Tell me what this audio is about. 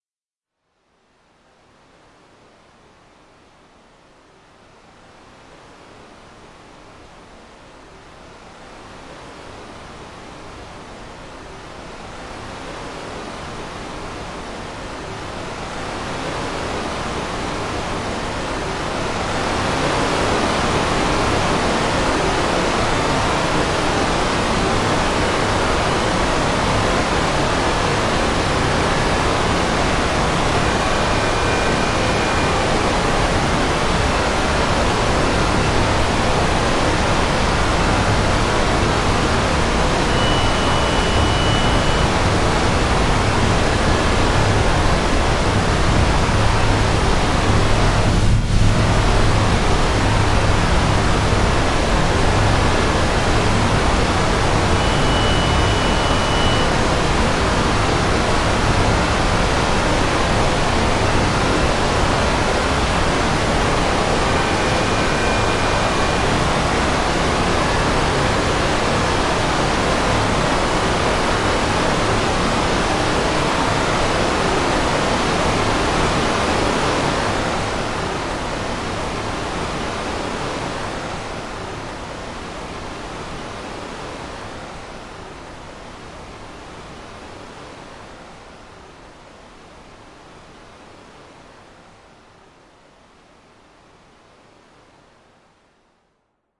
Space Machinery
Like regular machinery, but from space.